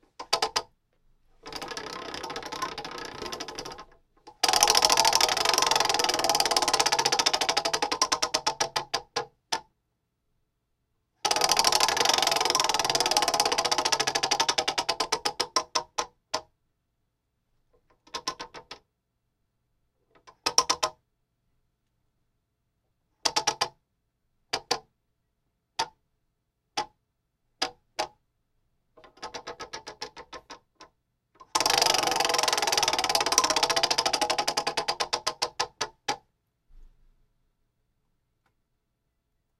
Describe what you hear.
the sound of a prize wheel spinning
spokes, wheel